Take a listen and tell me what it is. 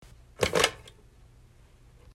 Answering a vintage rotary phone - used in THE NEW OLD-TIME RADIO SHOW production of Lucille Fetcher's "Sorry, Wrong Number." To show your support for our podcast click here: